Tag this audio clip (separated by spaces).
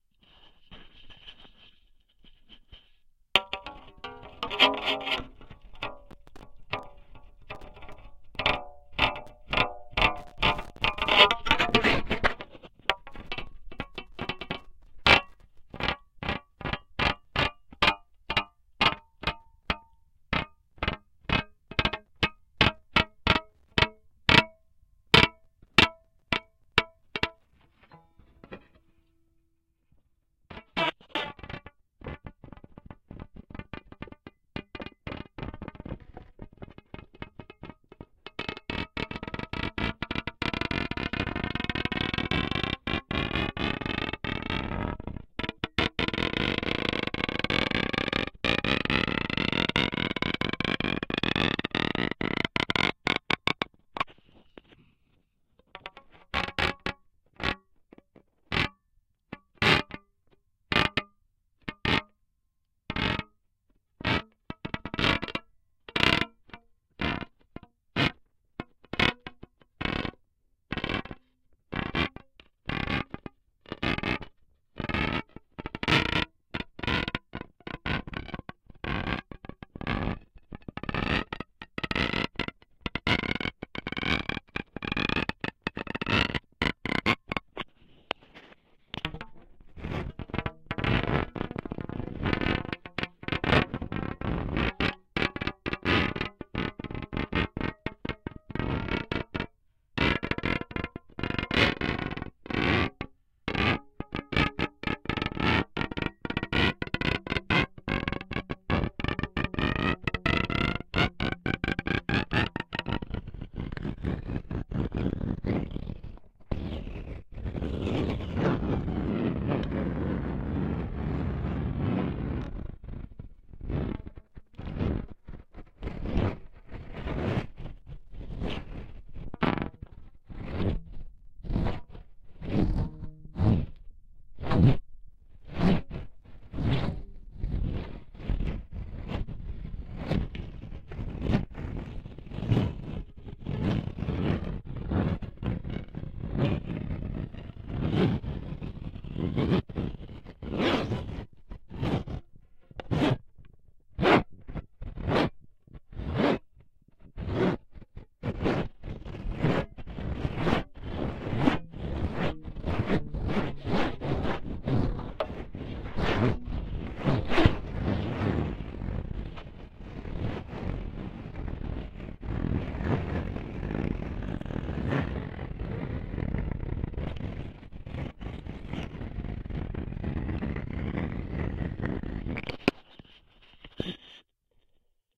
contact-mic guitar noise scrape